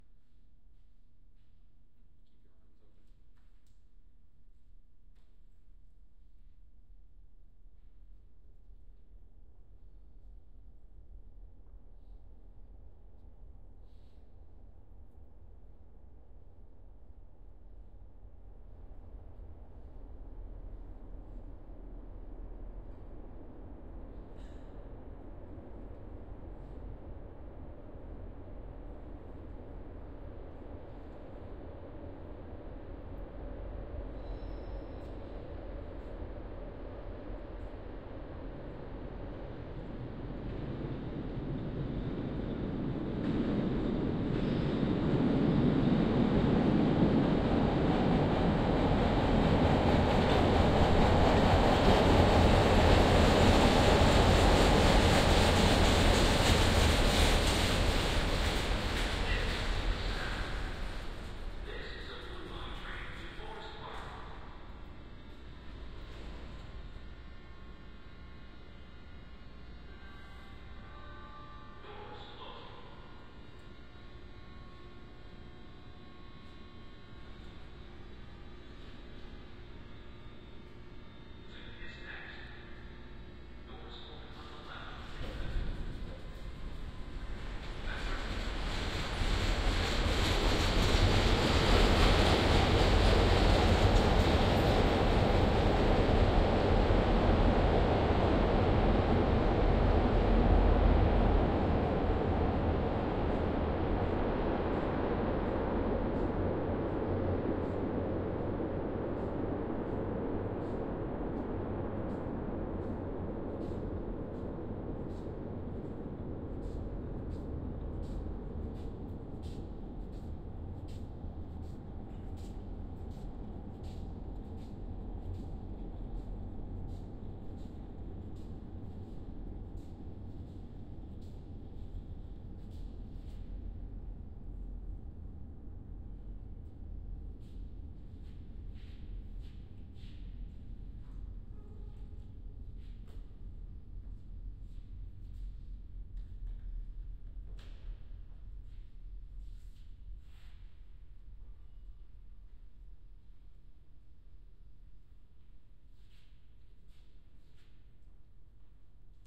chicago subway
Binaural recording of a subway train going by from right to left.